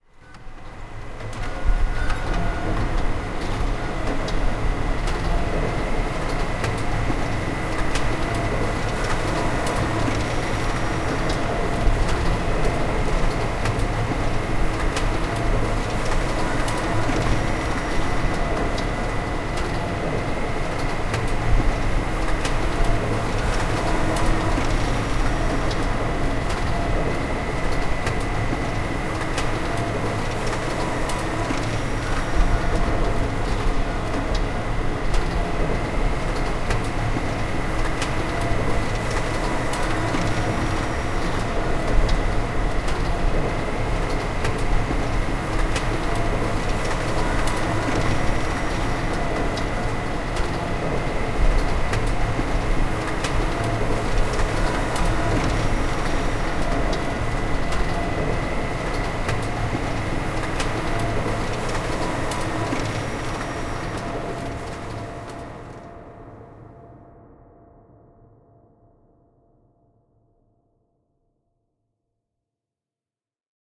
Ambience, Machine Factory, A
An artificially created ambience of some sort of industrial mechanized factory. The sound was constructed using a combination of sounds from my "Samsung MultiXPress Printer" pack with reverb added in Audacity.
An example of how you might credit is by putting this in the description/credits:
The sound was recorded using a "H1 Zoom recorder" and edited on 26th September 2016.
ambiance, machines, factory, printers, printer, ambience, machinery, machine, industrial